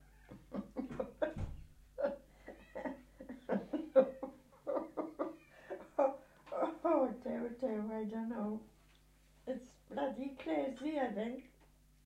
The sound of my mother having a good laugh over the constant miowing of her cat all the time and says i think its all crazy. Very natural that i thought was good enough to share.
cats, funny, humour, laugh, miow